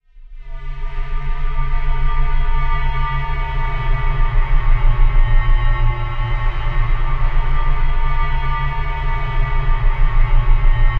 The tube.
a haunting long tube effect
effect, horror, tech